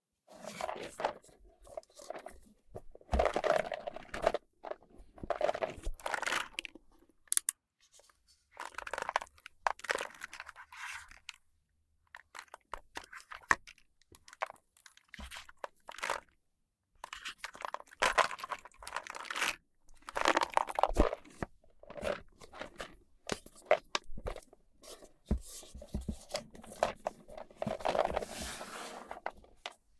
This sound was recorded with a Behringer B-1. It is a box of letter stamps being opened. The release of the muffled sound is quite nice.